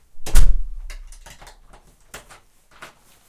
heavy door closing foley
a heavy wood front door closing-with lock and clothing foley
door, foley, house, wood